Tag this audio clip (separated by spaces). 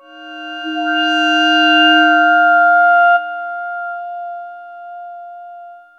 bell tubular